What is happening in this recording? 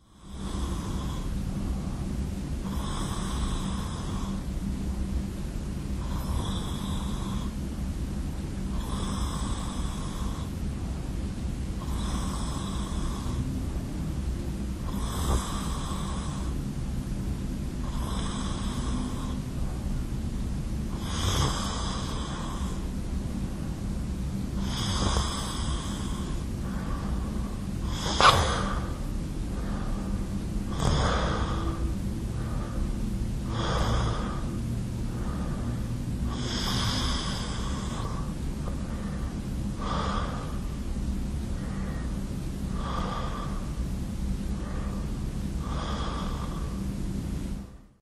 I fell asleep without switching off my Olympus WS-100 so it kept on recording for more then two hours. This is 48 seconds of that recording. You hear the usual urban rumour at night through the open window.
human, body, breath, bed, nature, noise, lofi